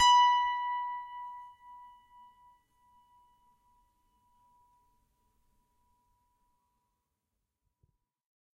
a multisample pack of piano strings played with a finger
multi, strings, fingered